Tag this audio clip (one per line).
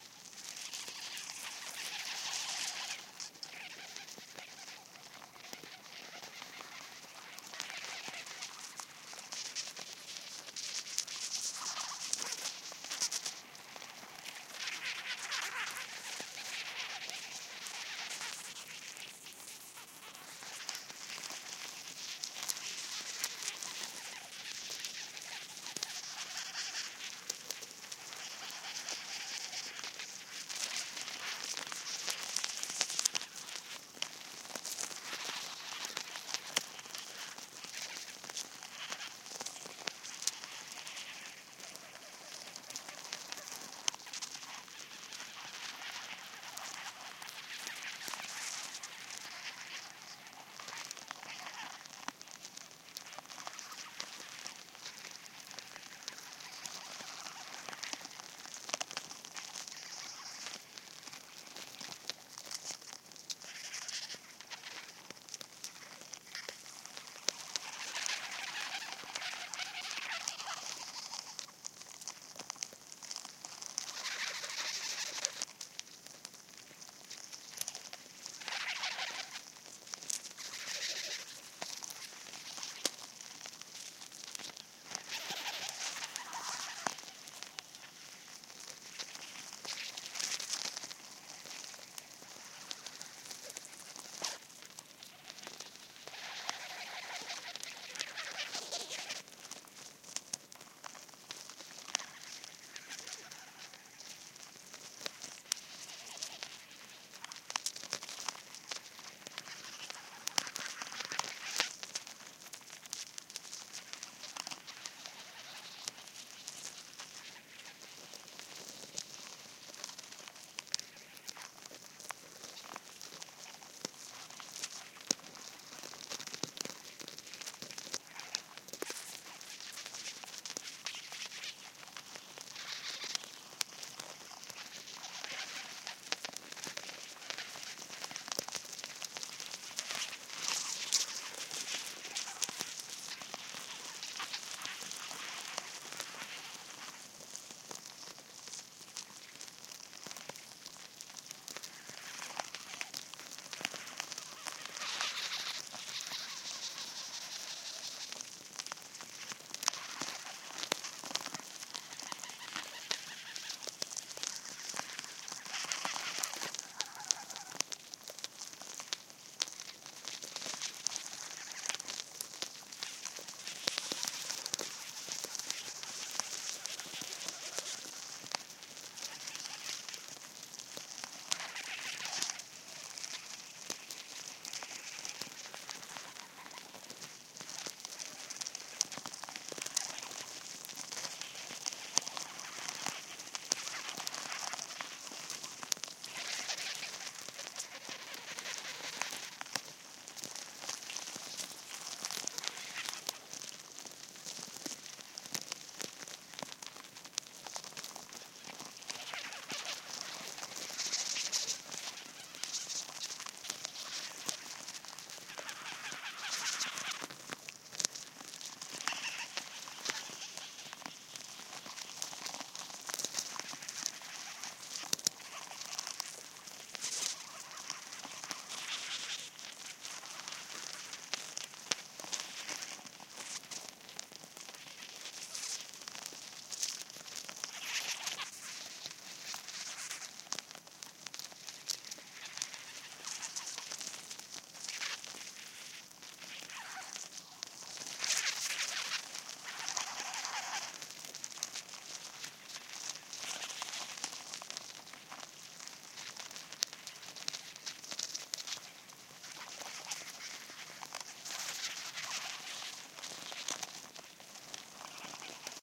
ants
microphone
contact-microphone
field-recording
contact